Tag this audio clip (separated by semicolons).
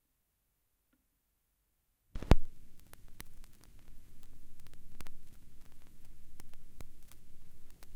33rpm; album; crackle; LP; needle; noise; pop; record; surface-noise; turntable; vinyl